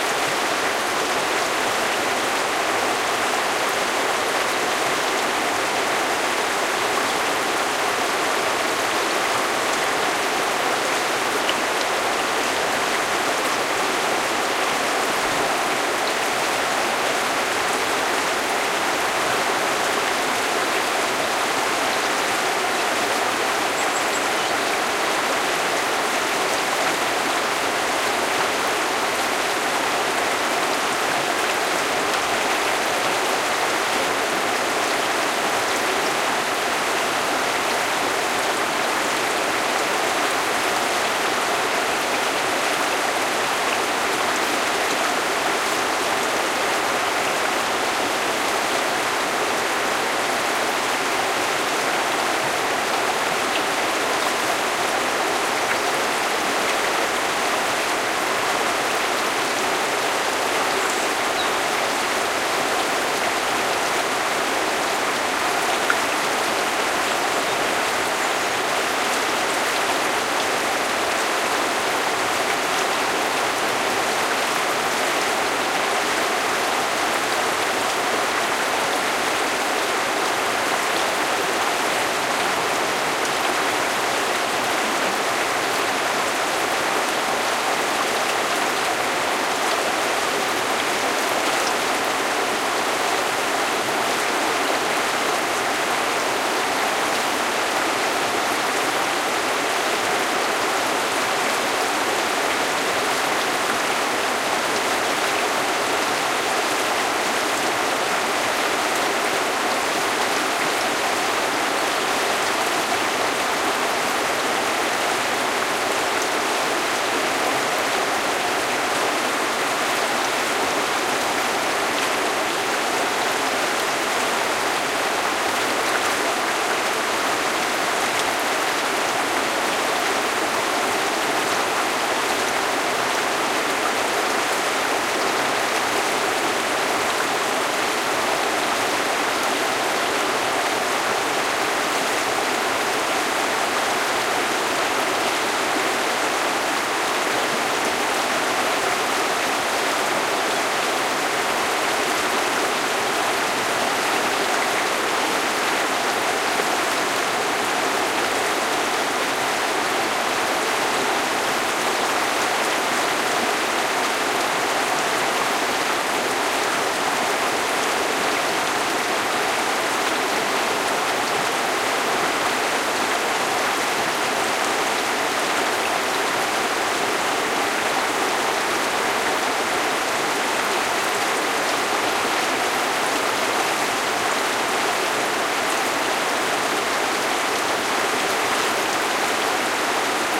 another perspective of a small river, some birds singing in background. Shure WL183 mics into Fel preamp and Olympus LS10 recorder. Recorded at Rio Castril, Granada, S Spain
nature, river, ambiance, field-recording, spain, stream, creek, countryside, water